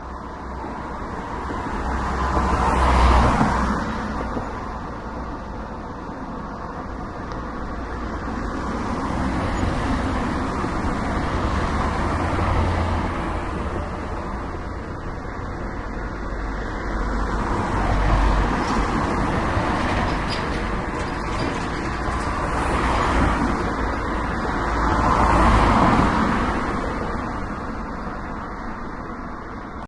SonicSnaps MB Ahmet
Sonic Snap form Ahmet from Belgian Mobi school , recorded near the highway.
soundscapes, mobi, sonicsnaps, cityrings, belgium